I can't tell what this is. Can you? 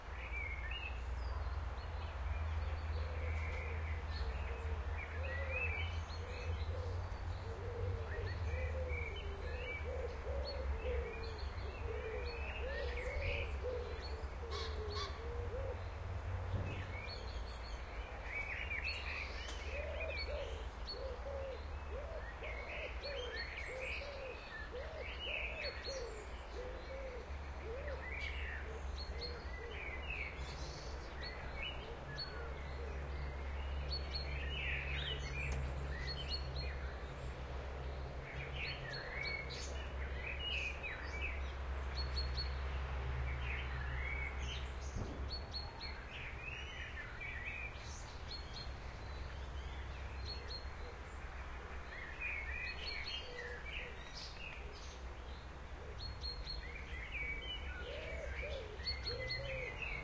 Part 5 in a 6 part series testing different Mid-Side recording setups. All recordings in this series were done with a Sound Devices 302 field mixer to a Sound Devices 702 recorder. Mixer gain set at +60dB and fader level at +7.5dB across all mic configurations. Mixer - recorder line up was done at full scale. No low cut filtering was set on either device. Recordings matrixed to L-R stereo at the mixer stage. The differences between recordings are subtle and become more obvious through analyzers. Interesting things to look at are frequency spectrum, stereo correlation and peak and RMS levels. Recordings were done sequentially meaning one setup after the other. Samples presented here were cut from the original recordings to get more or less equal soundscapes to make comparing easier. Recordings are presented here unmodified. Part 5: Sennheiser MKH 40 (mid) and Sennheiser MKH 30 (side).